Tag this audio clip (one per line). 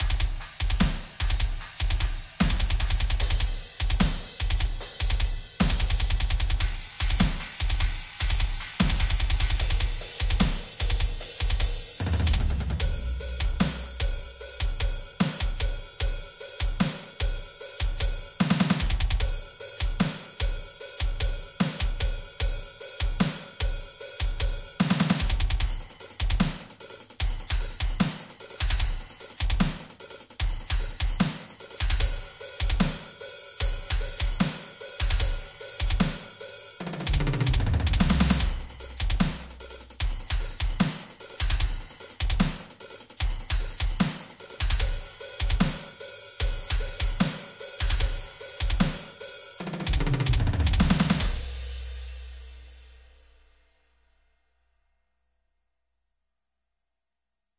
Cymbals
Hardcore
Drums
Double-Bass